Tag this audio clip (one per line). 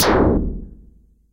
Reason
Audacity
image-to-sound
processed
electronic
drum
dare-26
snare